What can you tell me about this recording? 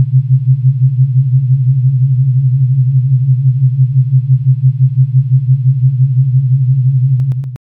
Detuned sine waves